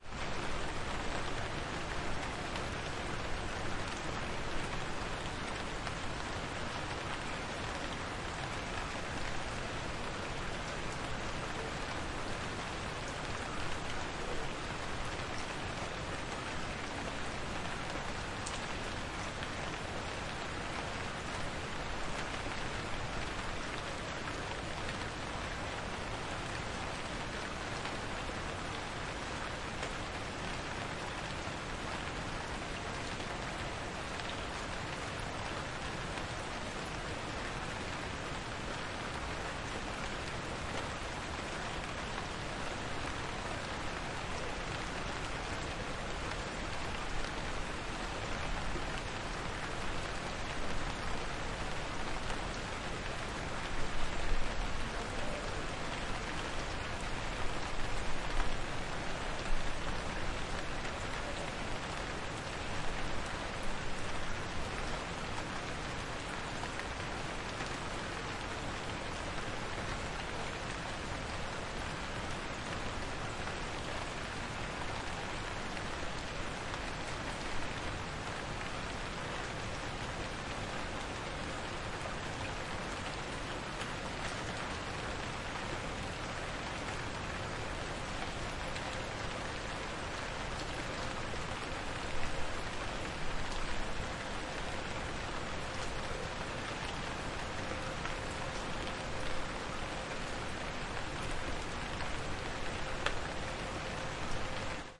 Light rain on street
Light rain recorded out of an open window into a street in a city at night.